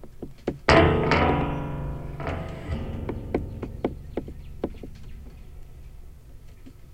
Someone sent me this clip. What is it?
door spring03
Contact mic on a door with a spring